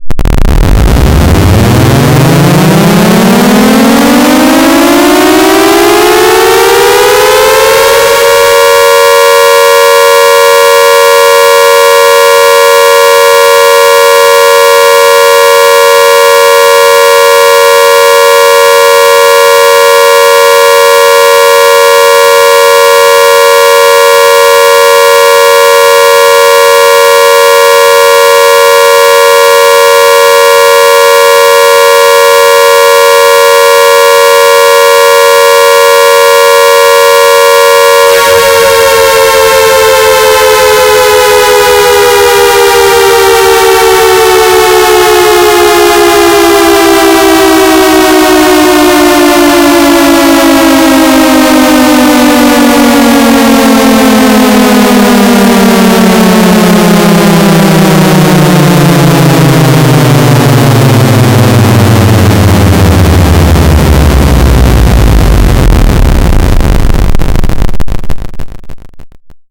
This is a Air Raid siren with a bit of delay. Made be me with Audacity. Enjoy, more to come soon, please request a siren that you guys would like me to do, I'm more than willing to take requests. This sound was designed for small war type movies and other things warning related. The siren does a 30 second Alert tone so I don't know how you guys would use it. I'd do attack but its kind of hard to do.